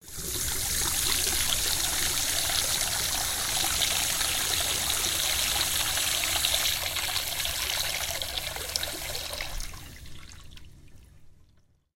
cold water tap running water into sink
Cold water tap running into a sink in a public toilet. The microphone used for this was a AKG C1000s condenser mic.
water, environmental-sounds-research